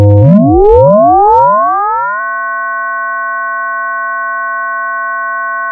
FM sine sweep upwards.